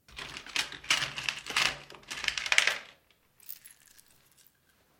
different noises produced with the screws, nails, buts, etc in a (plastic) toolbox
mechanics, cabinet, tools, garage, toolcase